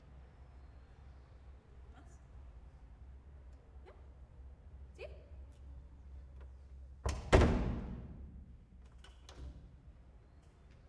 (E)Recording of a door being closed inside a classroom
(S)Grabación de una puerta siendo cerrada.